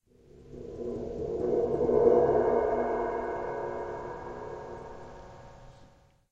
Suspended Cymbal Roll